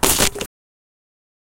Fucking Up #2
Field recording is, by nature, an aleatory or chance affair. The soundscapes we record are so heterogeneous in nature that each recording will necessarily be full of chance, non-repeatable elements.
Failures or accidents produced through mistakes in the process of recording generate interesting, novel sonic occurrences. One could take these 'mistakes' as simple technical failures to be remedied through practice; or perhaps one could take moments of failure as generative of novel acoustical phenomena. Novelty is the name of the game, in the Whiteheadian sense. Novelty, difference, heterogeneity; these are the values that field recording has trained my ear to appreciate.
These values have the capacity to upend a whole series of assumptions built into Western music in such a way as to tear down the hegemonic edifice of the colonizers' culture.